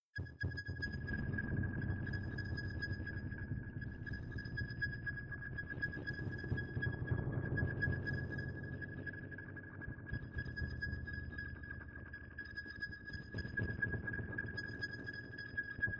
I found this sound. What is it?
Subtractor Pulsar insanity3
A sound for the "modular heads"...
Are the fans of analogue bleeps and squeaks destined to forever envy those who can afford the cost and space to have a modular synth? Ney, I say! Hand yourself not to the depthnesses of dispair!
Modular-like sounds are within reach of the common mortals and here are a few experiments to prove it.
In Reason 8, armed only with Reason's most basic synth (Subtractor) and Pulsar (Reason's free "demo" rack extension, which is basically 2 LFOs and an envelope), plus using Reasons reverb machine on multi-tap delay mode.
I did not even have to use the envelope from Pulsar, only the LFOs...
Did not even have to fiddle much with the default preset from Subtractor...
And there is no note playing, MIDI or sequencing involved: All the sounds are triggered by the LFOs fed to Subtractor's gate and CV input.
What could be easier?